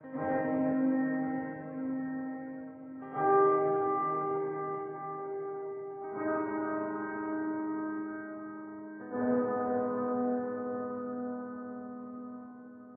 A simple 4 note bridge or loop in a minor mode, performed on the "ghost piano" - distant-sounding with lots of reverb but highly compressed to give it presence. Instrumentation, composition, and effects in FL Studio 7.